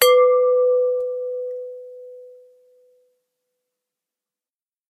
Medium sized Pyrex bowl struck once with a fingernail. Recorded with a 5th-gen iPod touch. Edited with Audacity.